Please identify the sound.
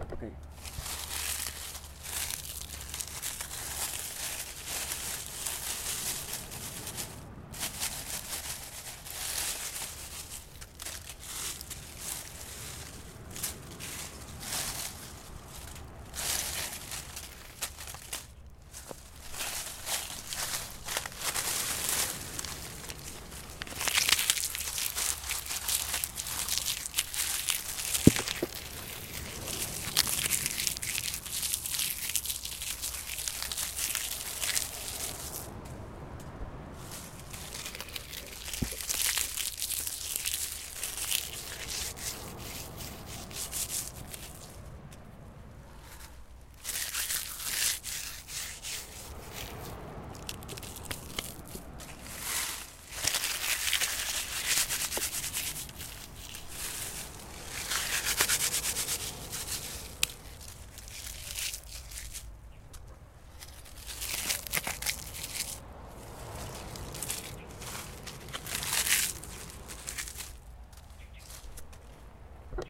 Hacsa Beach Coloane Macau